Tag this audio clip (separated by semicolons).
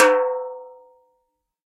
velocity; drum; 1-shot; multisample; tom